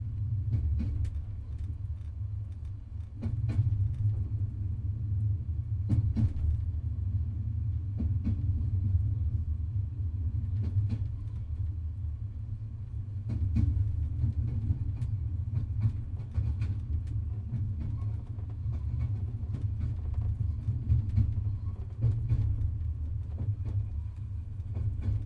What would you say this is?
Inside a sleeping car of the train during night.